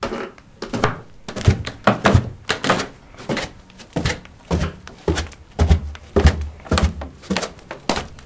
I'm walking up or down wooden stairs. A bit of wooden cracking can be heard. Recorded with Edirol R-1 & Sennheiser ME66.